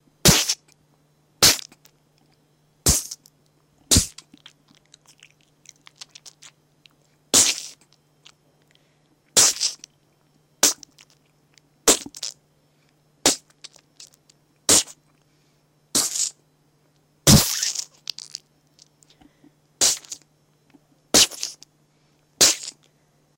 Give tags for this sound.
blood body bullet entering gush hit knife spit

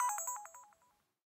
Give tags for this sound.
beep camera electronic mechanic shutter

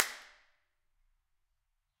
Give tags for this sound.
reverb Impulse-Response impulse IR

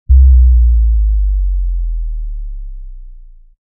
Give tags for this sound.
ambient Bass boom drop fx low sample

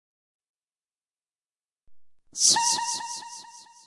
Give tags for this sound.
animation audiovisuales foley

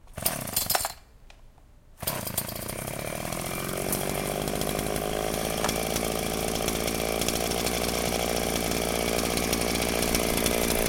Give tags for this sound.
idle start chainsaw

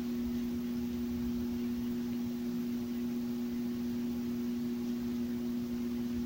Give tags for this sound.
light Lamp Buzz

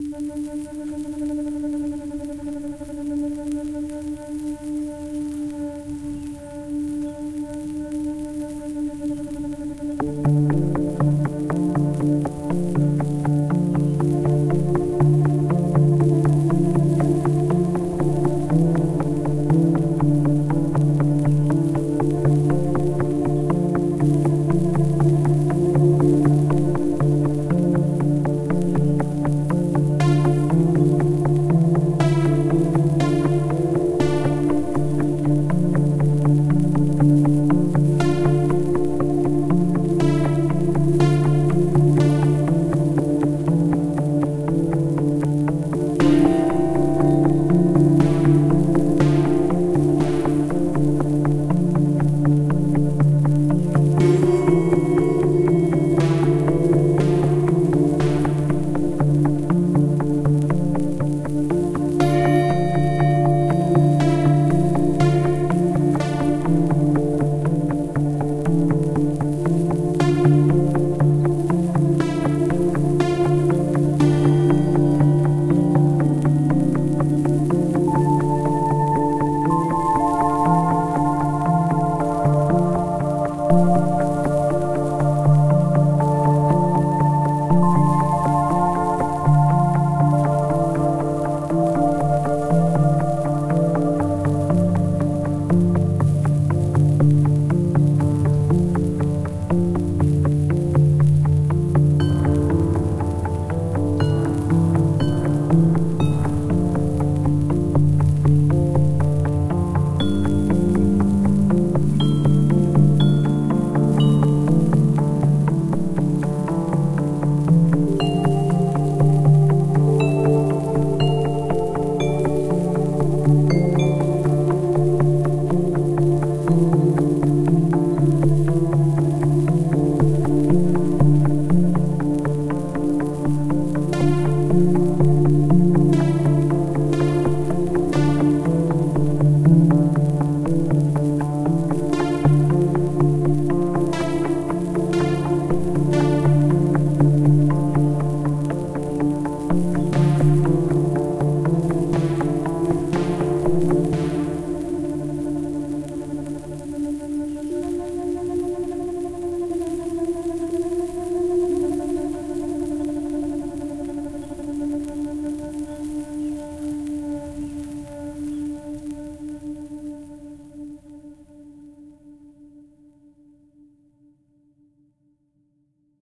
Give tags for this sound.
anxious Creepy danger drama dramatic Film ghost halloween haunted Horror Movie music nightmare scary Sci-Fi Theme Trailer Tv-Show video-game

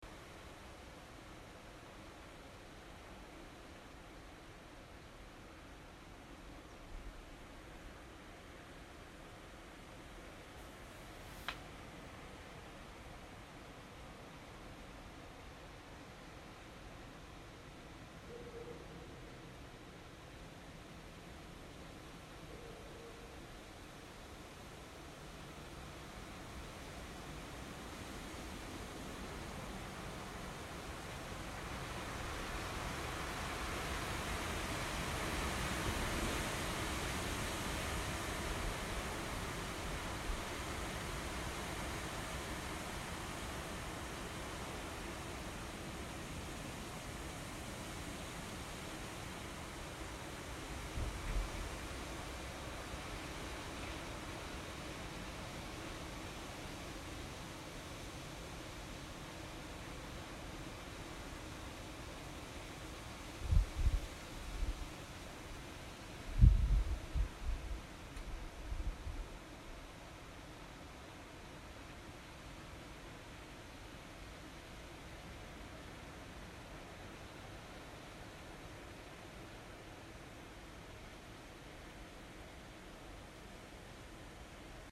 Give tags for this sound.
Czech-Republic trees

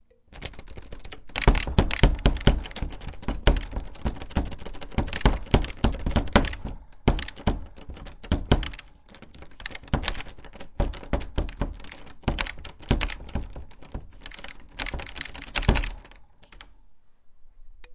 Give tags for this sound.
horror anxiety trap door lock rattling rattle trapped locked

